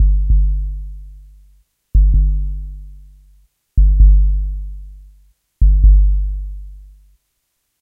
like stamping on an enormous analogue drum